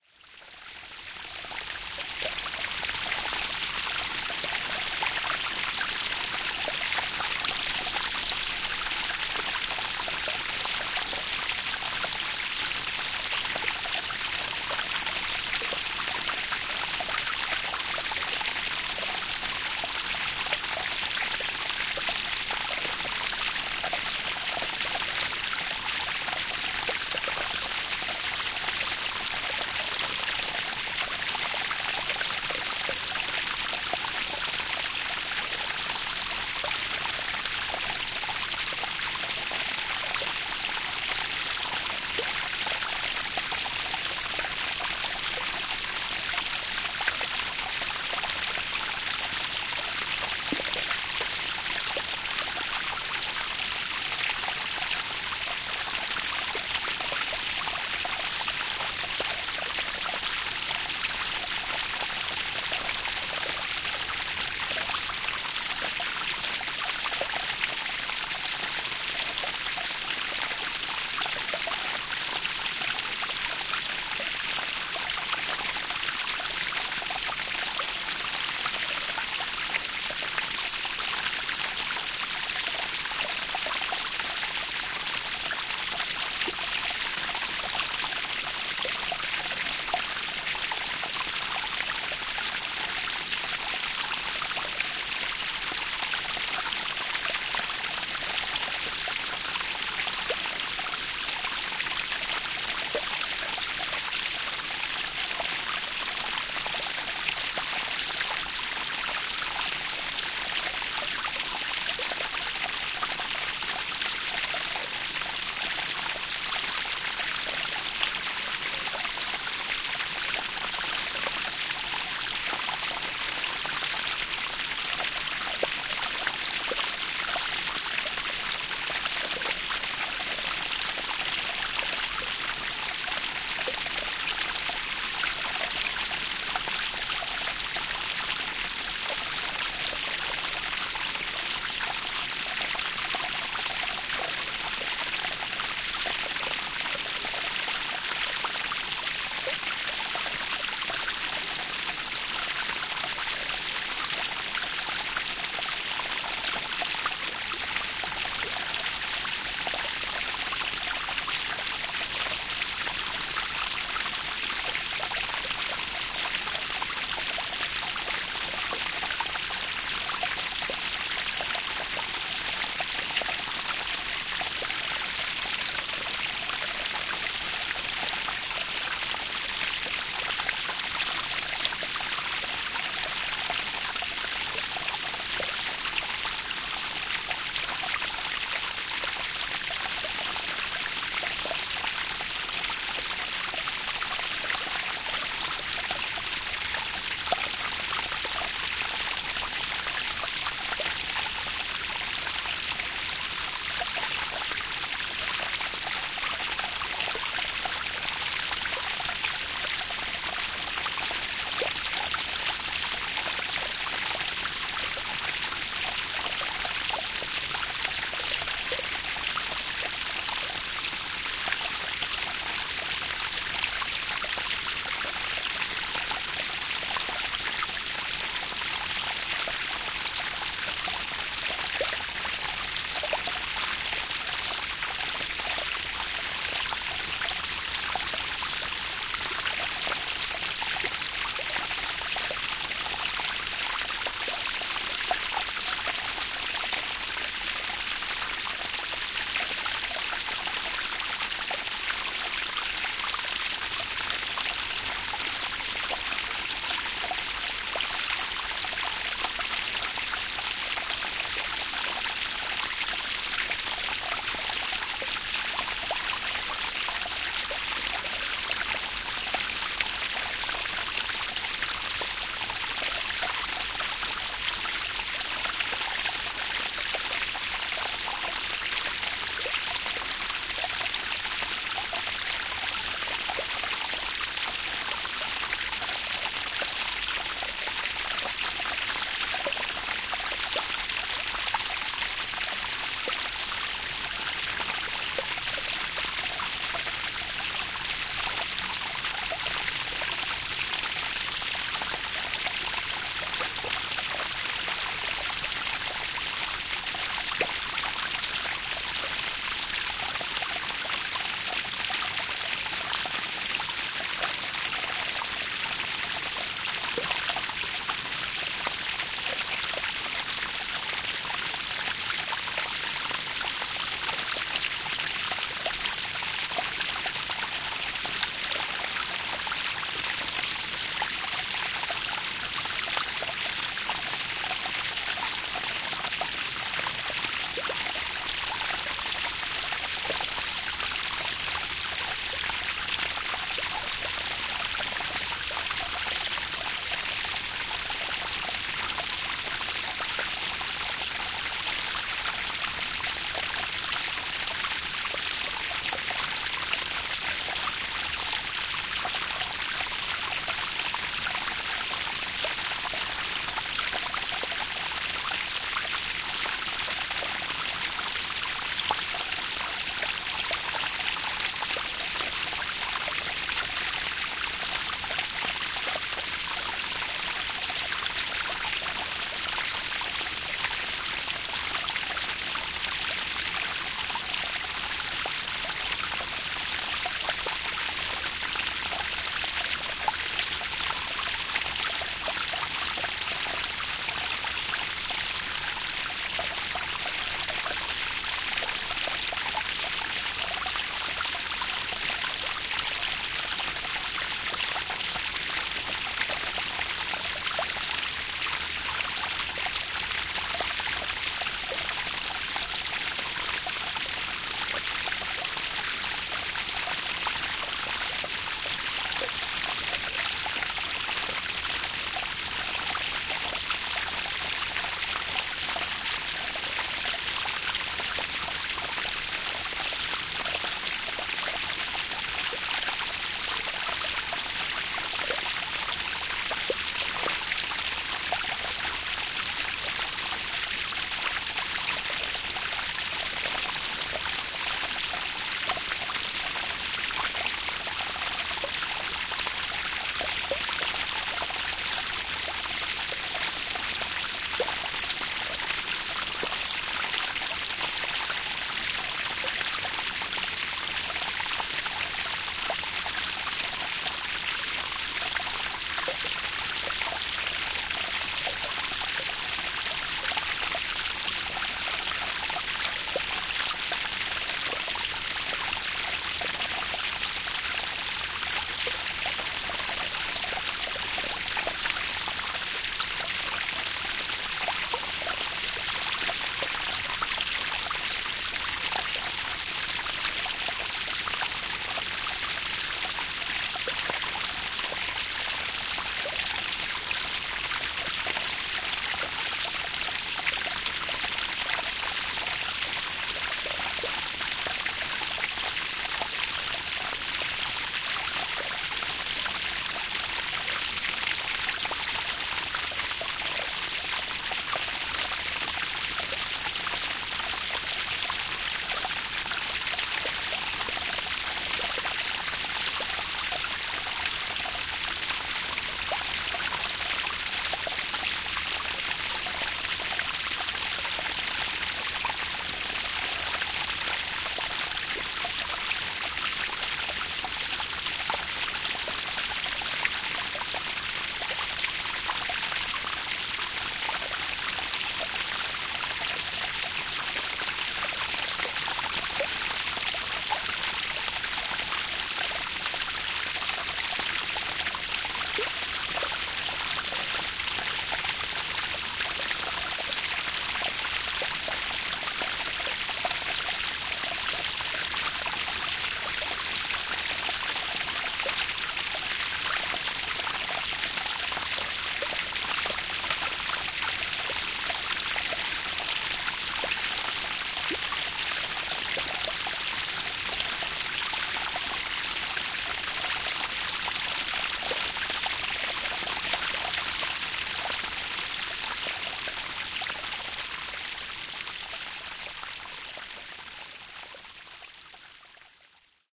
Waterfalls at Boykin Springs, recorded May 2, 2010 with Marantz PMD661, 2 Rode NT1A mics, a custom-built wind cage, and a comfortable camp stool. Used Olson stereo mic technique slightly modified - used 140 degree angle between mics instead of 135 degrees; did use the standard 20cm between center of mics, though. Positioned the mics about 2 feet in front of the waterfall section that stands about 2 feet high, and elevated them about 6 inches off the stone stream-bed at the base of the small falls. There are 4 or 5 waterfall sections located there, each one progressively taller or shorter (depending on which direction you're walking, of course). After Hurricane Rita, the park was closed for 3 years due to all the tree damage to these falls. The original waterfalls were built back in the 1930s by the WPA (Works Progress Administration) from what I've heard among local people, but they had to be completely rebuilt since 2005. They used to have trees all around them, too.
east-texas, waterfall, boykin-springs